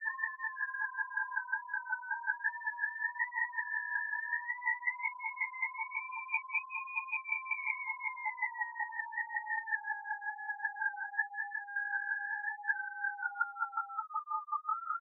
Sequences loops and melodic elements made with image synth. Based on Mayan graphical chart.